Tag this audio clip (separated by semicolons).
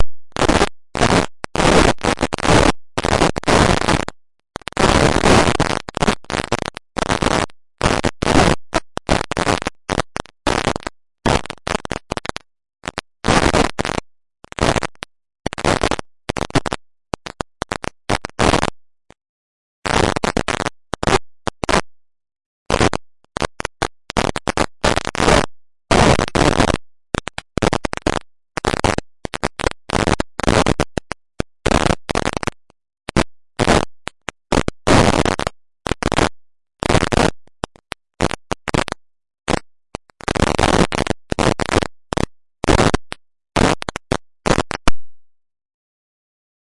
4x4-Records; Bass; Clap; Closed; Da; Dance; Drum; Drums; EDM; Electric; Electric-Dance-Music; Hi-Hats; House; J; J-Lee; Kick; Lee; Loop; Music; Off-Shot-Records; Open; Ride; Sample; Snare; Stab; Synthesizer